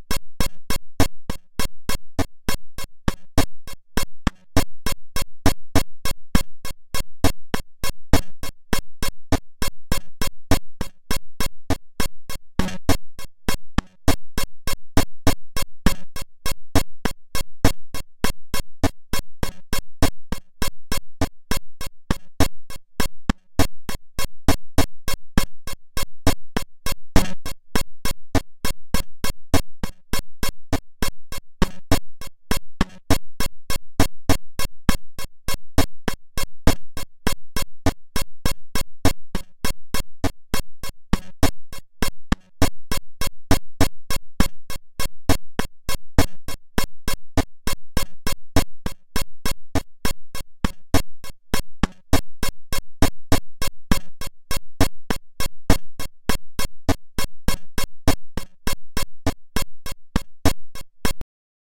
Audio 17Patt 13 100 bpm17
The sound chip of the HR 16 has a LOT of pins. A ribbon cable out to a connection box allows an enormous number of amazing possibilities. These sounds are all coming directly out of the Alesis, with no processing. I made 20 of these using pattern 13, a pattern I'd programmed a long time ago. But I could have made 200.. there's so many permutations.
Alesis, circuitbent, glitch, percussive